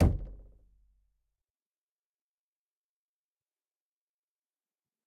Door Knock - 40
Knocking, tapping, and hitting closed wooden door. Recorded on Zoom ZH1, denoised with iZotope RX.